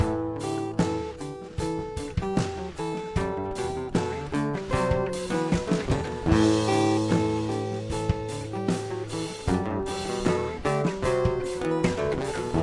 Gur Durge loop
created with looping pedal
original, groove, guitar, moody, acoustic, loop